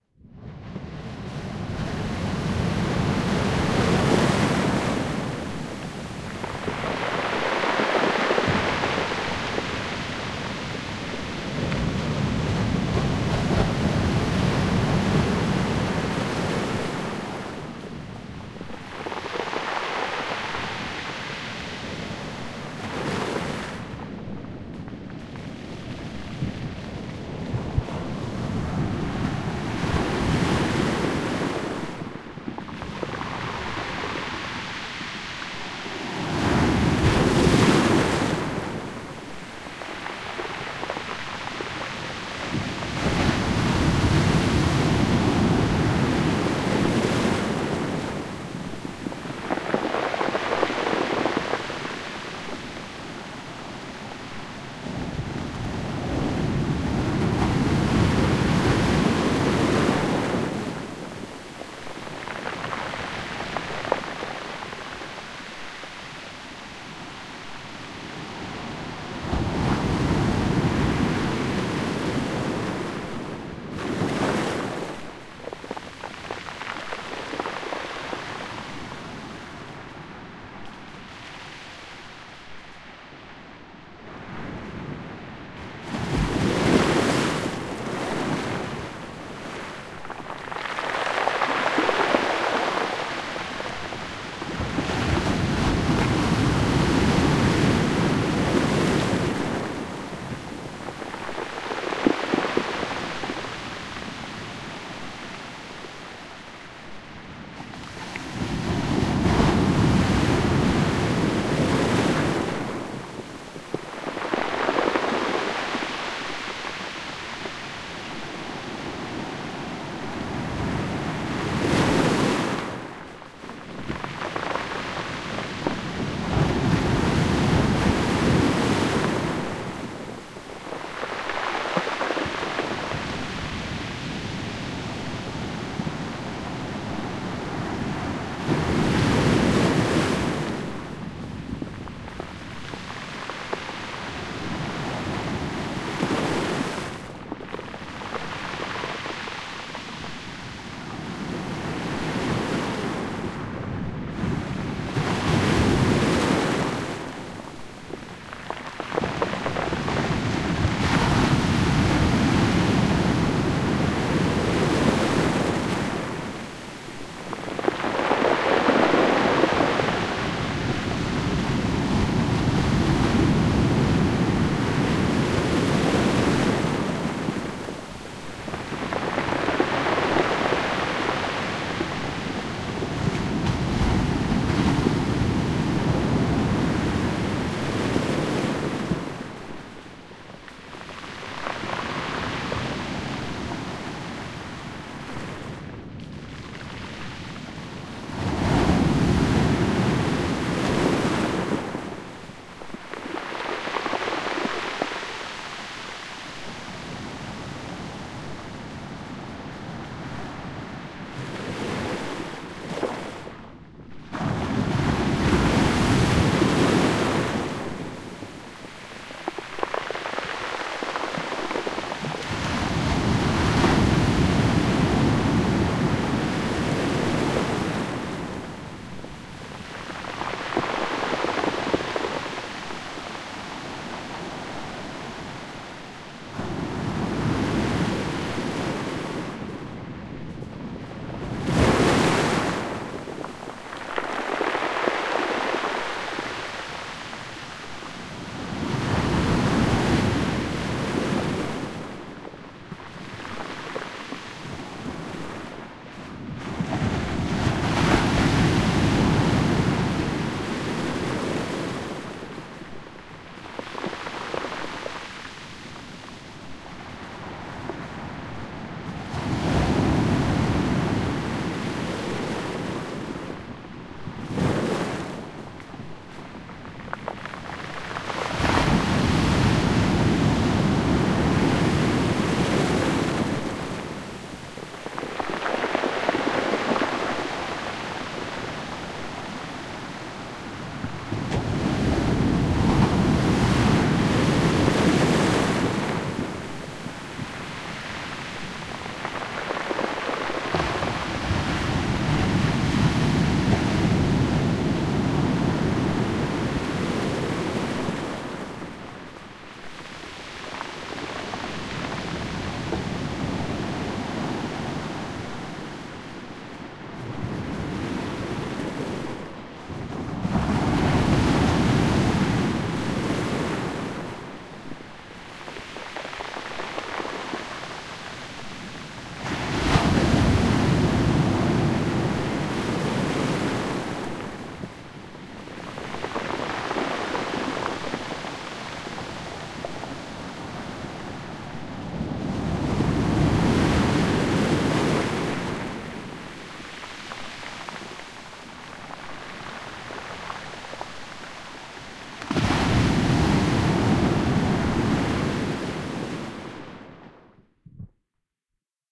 Waves On Pebble Beach
A stereo field-recording of waves breaking on a pebble beach. Zoom H2 rear on-board mics, handheld close to the water's edge and pointing down towards the breaking waves and foamy backwash. No birds or vessels. Recorded on a cold and foggy day.
pebbles, sea, stereo, waves